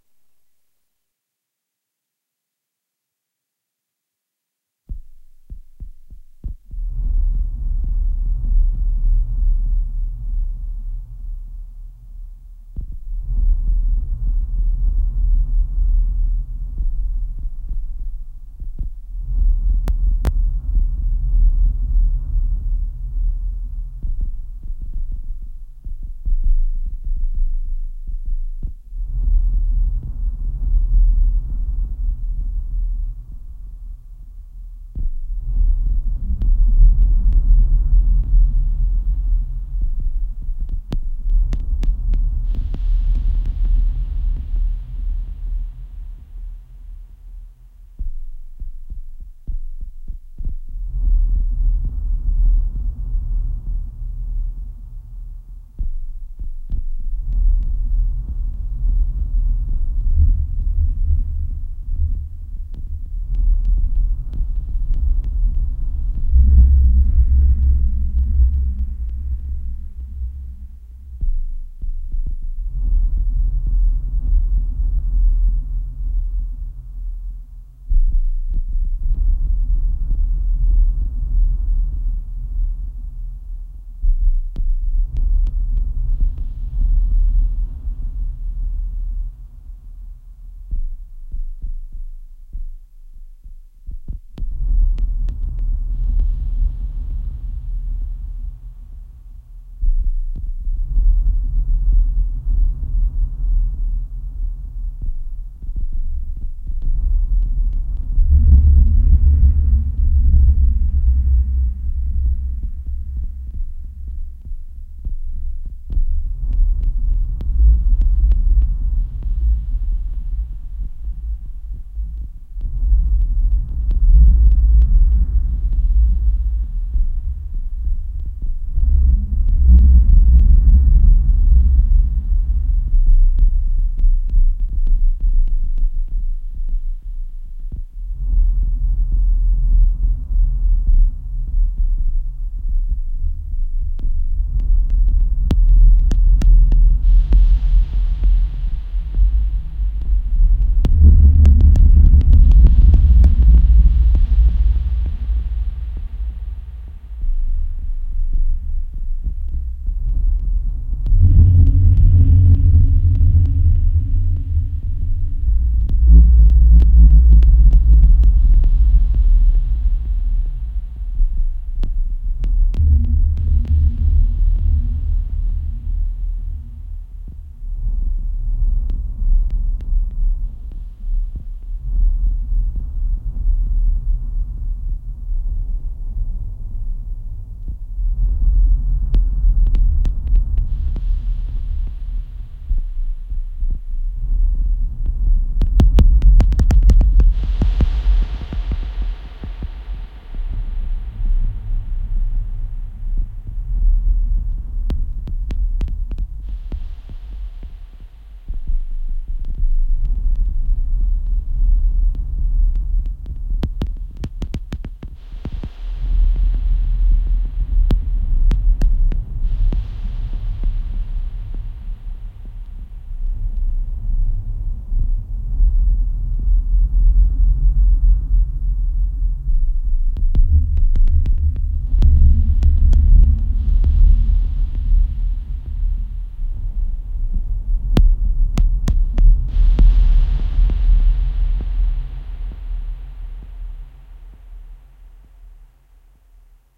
This was a pretty hard work.
I only use a Triple OSC and I took an advantage of the several ASIO bugs of my soundcard. I don't mix the sound. I've re-recorded that shape 15 times, after that, I applied massive echo and reverb filters.
3 OSC, echo, reverb, compressor.